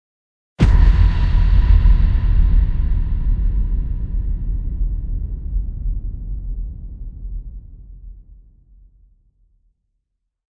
Great for Halloween.
Halloween, impact, sound